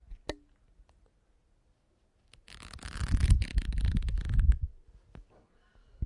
BOOK PAGES 01
move book pages. Zoom H1 recorder, unprocessed
pages, home, book